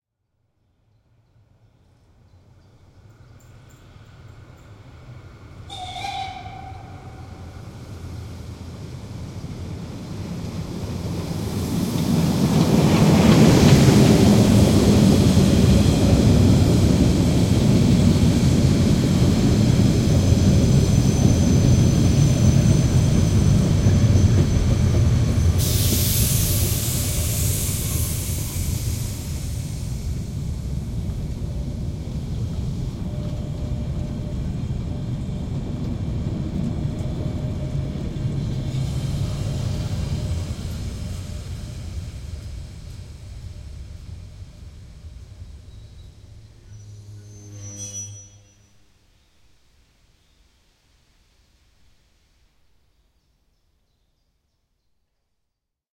Steam-Train Molli - with whistle and arrival
Steam-Train Molli from Kühlungsborn (Germany) with whistle...
recorded in Jun/2015 on zoom H2 with buildin microphones
cut ´n fades over Audacity
molli, steam, train